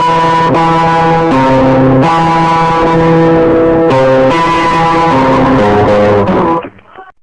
A little line, choked off at the end.